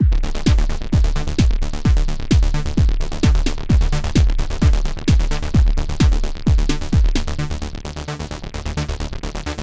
Synth Arpeggio 01
Arpeggio Loop.
Created using my own VSTi plug-ins